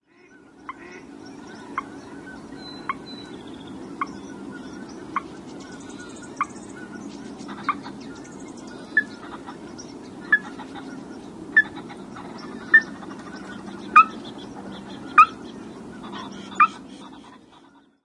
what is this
ag22jan2011t14
Recorded January 22nd, 2011, just after sunset.